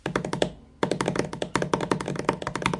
HUGUET Pauline 2018 tamtam
It is a recorded sound. Hands bang a table. I modified the sound by increasing the 46 % speed then to truncate silence, and I put an echo